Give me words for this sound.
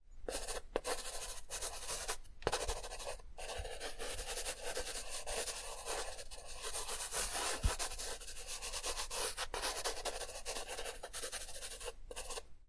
Raw audio of writing on paper with a lead pencil. The recorder was placed a few centimeters away from the pencil and followed its path.
An example of how you might credit is by putting this in the description/credits:
The sound was recorded using a "H1 Zoom recorder" on 1st November 2016.